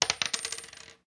Clad Quarter 4
Dropping a quarter on a desk.